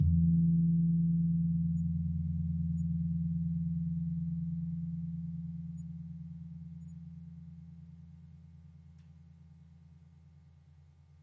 GONG GKPL3p
CASA DA MÚSICA's VIRTUAL GAMELAN
The Casa da Música's Javanese Gamelan aggregates more than 250 sounds recorded from its various parts: Bonang, Gambang, Gender, Kenong, Saron, Kethuk, Kempyang, Gongs and Drums.
This virtual Gamelan is composed by three multi-instrument sections:
a) Instruments in Pelog scale
b) Instruments in Slendro scale
c) Gongs and Drums
Instruments in the Gamelan
The Casa da Música's Javanese Gamelan is composed by different instrument families:
1. Keys
GENDER (thin bronze bars) Penerus (small)
Barung (medium) Slenthem (big)
GAMBANG (wooden bars)
SARON (thick bronze bars) Peking (small)
Barung (medium) Demung (big)
2. Gongs
Laid Gongs BONANG
Penerus (small)
Barung (medium) KENONG
KETHUK KEMPYANG
Hanged Gongs AGENG
SUWUKAN KEMPUL
3. Drums
KENDHANG KETIPUNG (small)
KENDHANG CIBLON (medium)
KENDHANG GENDHING (big)
Tuning
The Casa da Música's Javanese Gamelan has two sets, one for each scale: Pelog and Slendro.